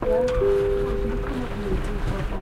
2 08 train bell f
Short edit of a train announcer's bell.
bell, field-recording, railway